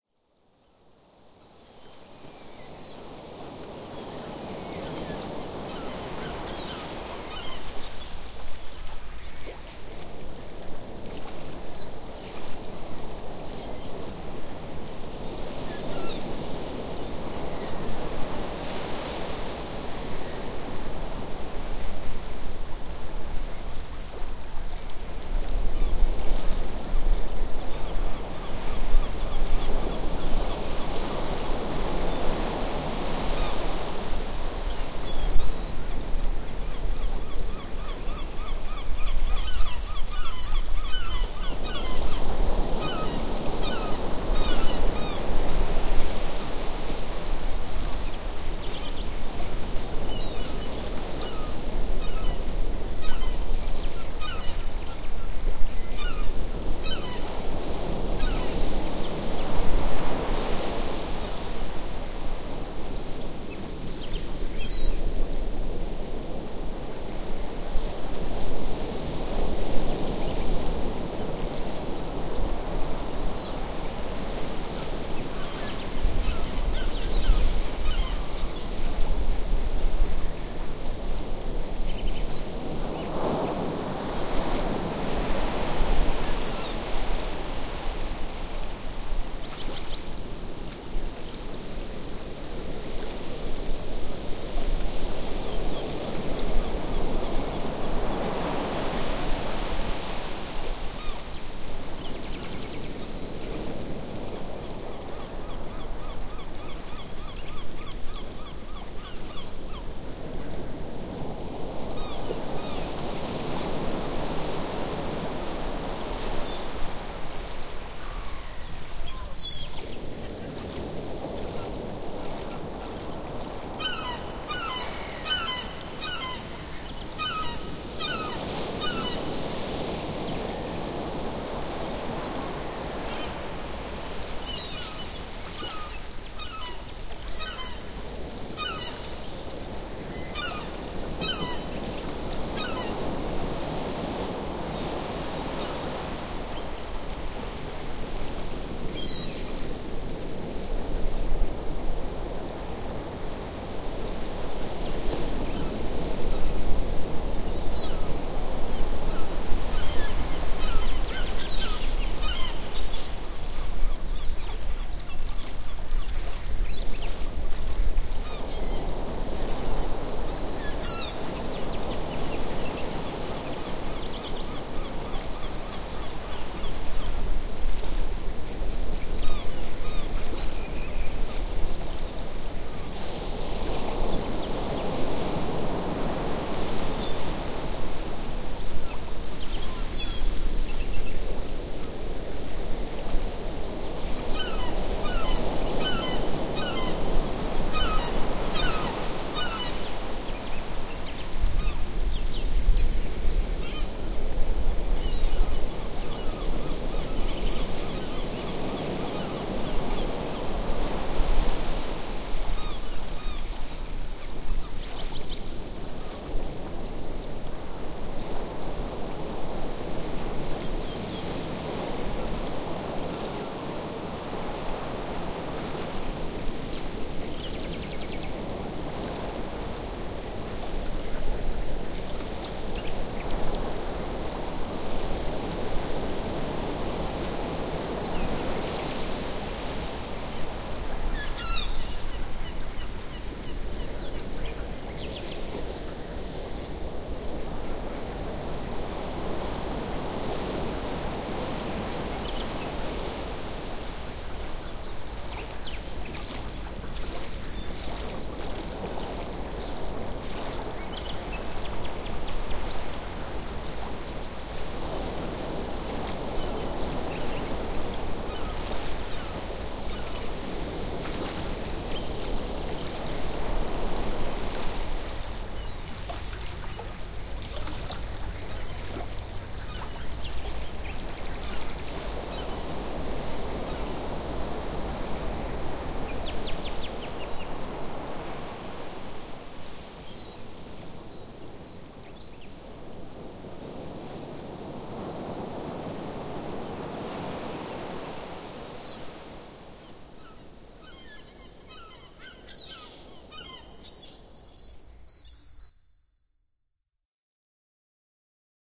Enjoy
Thank you.
424386
417876
Nature » Birds and ambience by tryl
32930
353416
on the beach » Seagull on beach by squashy555
425878
426020
atmosphere, field-recording, ocean, ambiance, background, seagulls, splash, sea, Beach, surf, birds, waves, water